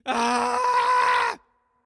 cell scream4
short weird scream for processing "AAh"